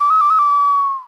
Few notes of a small ceramic ocarina, made by myself.